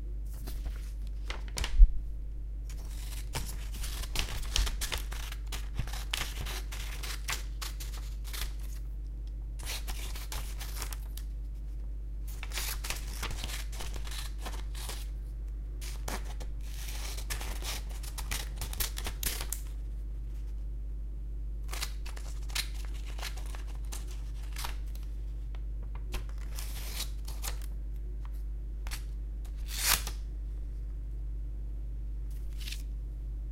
Paper cutting
Paper being cut by scissors in an office.